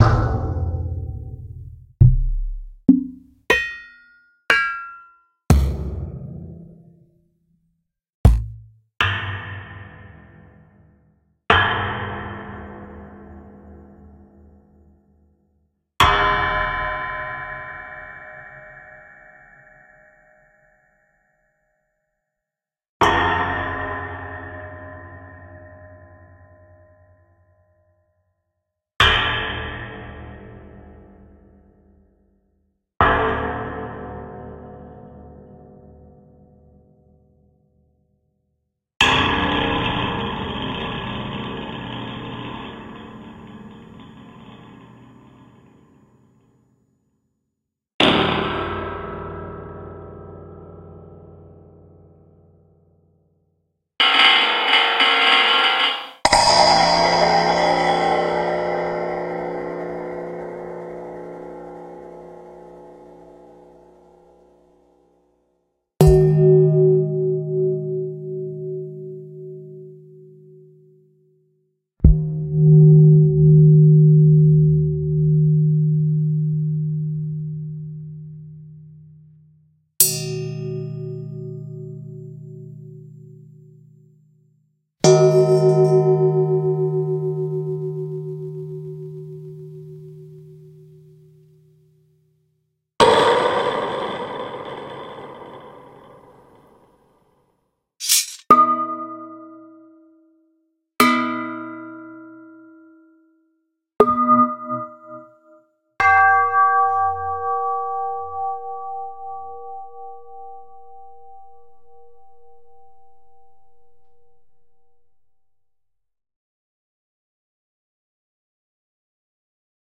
sigil-mgReel-perc
"Sigil" Reel 2: Percussion
Created by Nathan Moody, formatted for use in the Make Noise soundhack Morphagene.
This is one of two Morphagene Reels made from one-of-a-kind handmade electro-acoustic instruments and found objects created by sound designer and musician Nathan Moody, an outgrowth of his 2018 album, "The Right Side of Mystery."
This reel is focused on percussive, inharmonic content from found objects and one-of-a-kind handmade instruments including metal table tops, perforated steel gongs, stretched springs, steel tubes, a drum made from packing tape, shakers made of tin cat food lids, and much more. They were struck with rubber mallets, carbon fiber rods, drumsticks, and woolen tympani mallets. They were recorded with a variety of microphones (Sennheiser MKH50, DPA 4061, Audio-Technica AT4050, Shure SM57 and Beta 52a), through AwTAC Channel Amplifier and Neve 511 preamps.